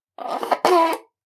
Straw being inserted into a takeaway drink.
pop, straw, food, ice, takeout, beverage, bottle, cola, cold, drink, fast, water, break, squash, container, takeaway, fastfood, drinking